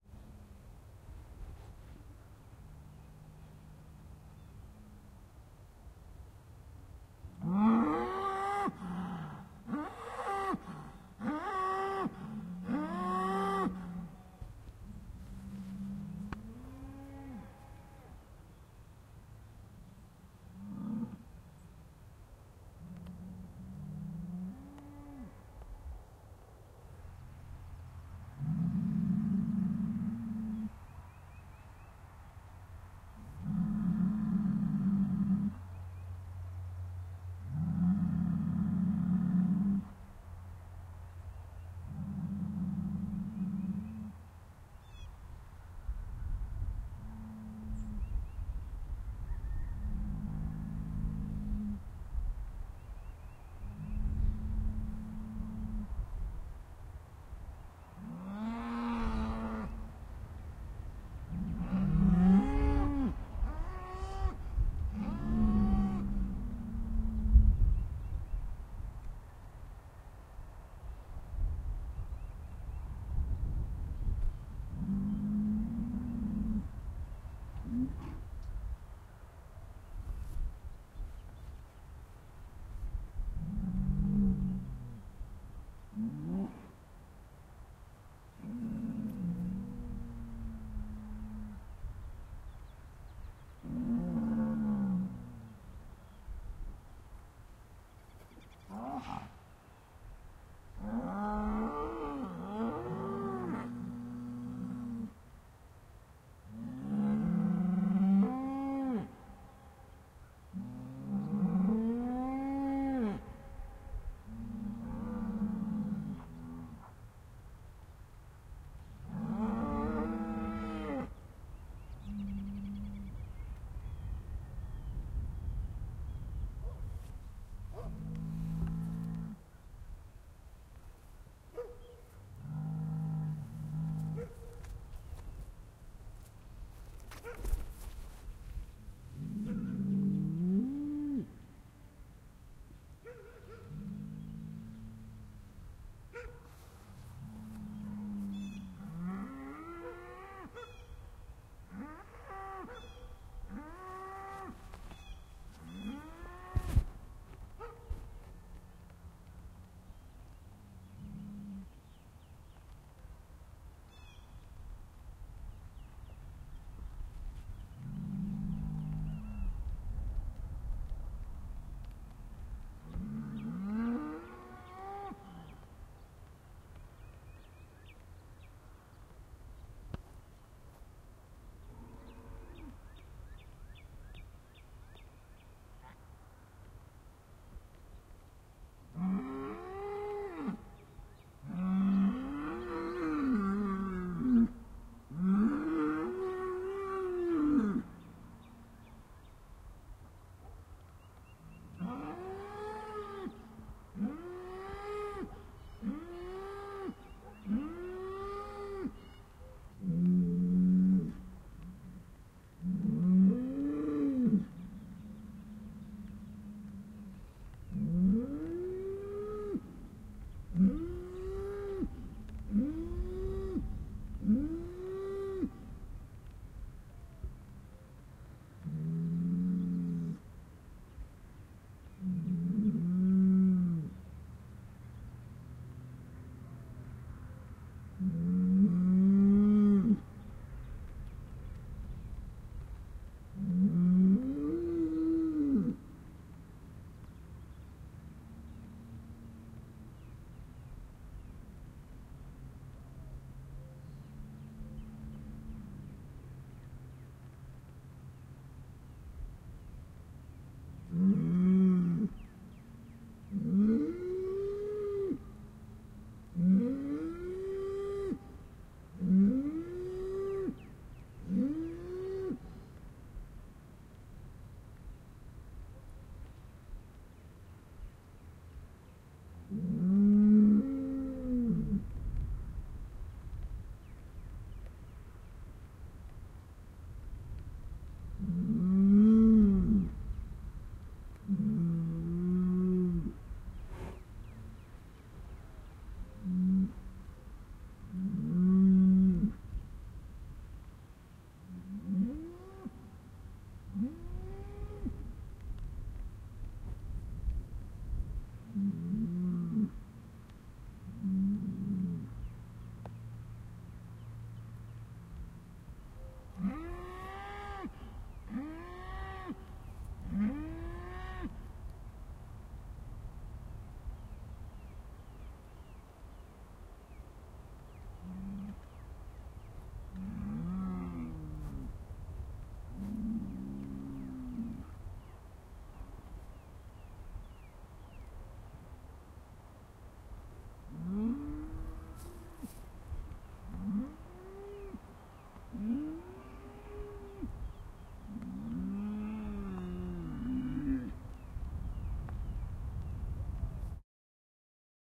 Mooing Cows, Sad, Upset
Heard some cows across my street mooing at each other. They sounded sad and/or upset. Recorded on the Zoom H4N. Edited in Reaper to remove dead air/movement/excessive wind and EQ'd some of the high frequency noise out.
ambience, animal-sound, Birds, Cow, Moo, Mooing, nature, Outdoors, sad